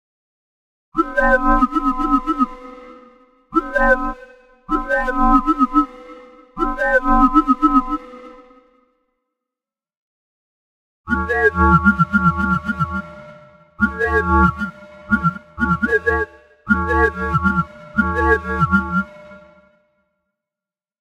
This is sort of a laugh like noise. Created using amSynth, JackRack and LV2, Laspa filters. Pretty funny sounding! LOL!
Laugh, noise, synthetic, Vocal-like, weird